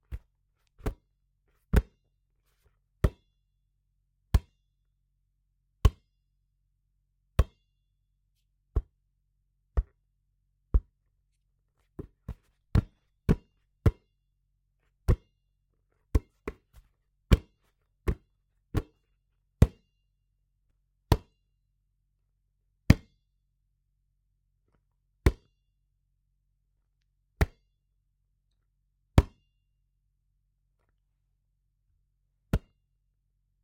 basketball catch
Catching basketball sound recorded with H5 Zoom with NTG-3 mic.